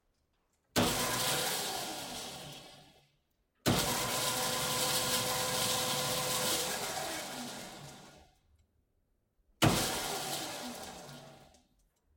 Metal Saw 01
Large Marvel No 8 metal cutting bandsaw from WWII.
Rode M3 > Marantz PMD661.